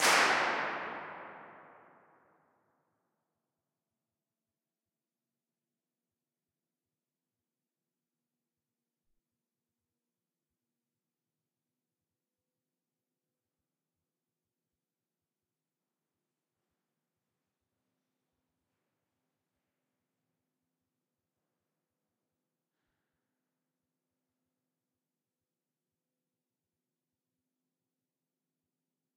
Spinnerij TDG tower mid km84-04
Tower IR halfway. Recorded with Neumann km84s. ORTF Setup.
reverb, IR, convolution, impulse-response